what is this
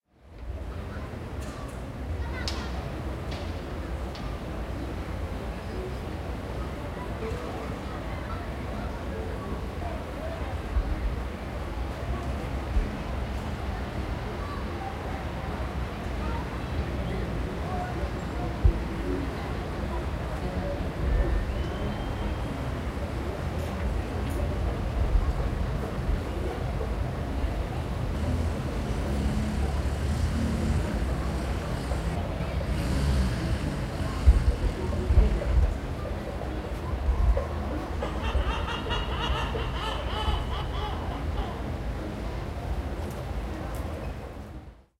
Street Ambience Morocco
Recorded in Agadir (Morocco) with a Zoom H1.
Agadir, ambience, beach, birds, Morocco, roaring, seagul, street, water